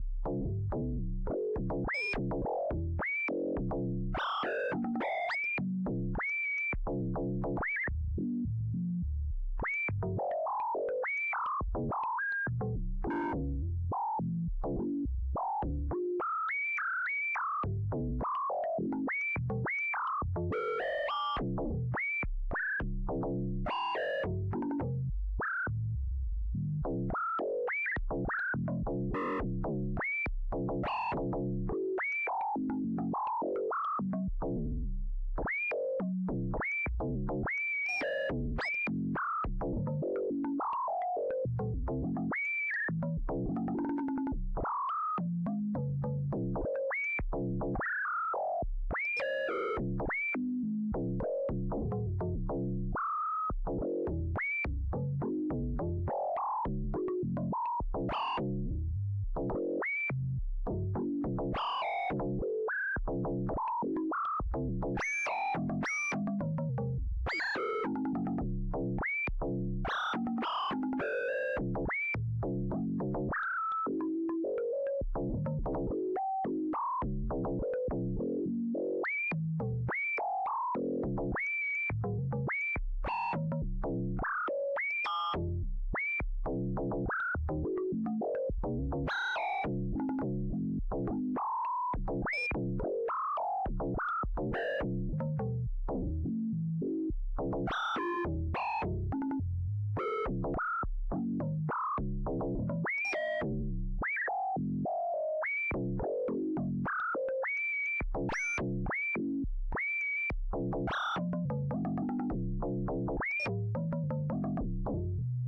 Blonk Seq
A Fast Sequence on a doepfer modular
FM -> VCA -> Waveshaping -> lopass gate
Spring Reverb
synthesis, Synthetic, Synth, doepfer, Modular, Bell, FM, noodle, Noise, Synthetizer, west-coast-synthesis, sequence